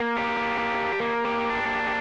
Randomly played, spliced and quantized guitar track.